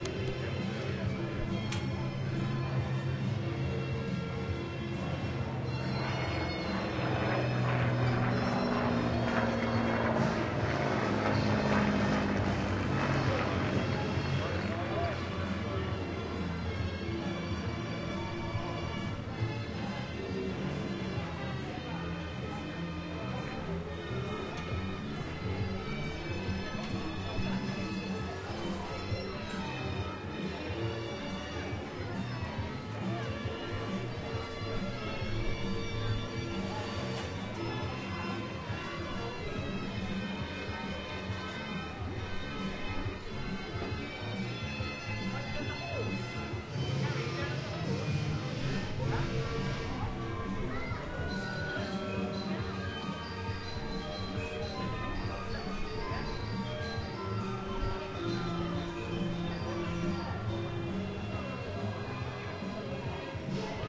Ambient sounds of sea and people and amusements at Herne Bay, Kent, UK in the last week of July 2021. Things were probably a little quieter than usual because of coronavirus even if the official lockdown ended a week or so earlier.
Herne-Bay, ambient, field-recording, seaside